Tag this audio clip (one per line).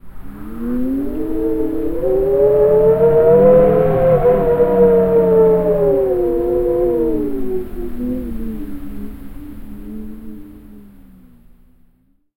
wind
windy
draft
cold